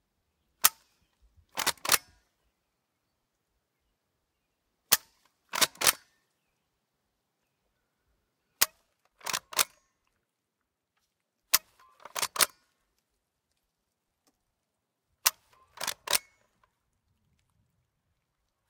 Winchester 1873, cock and dry fire
Cocking and dry-firing a 1873 Winchester rifle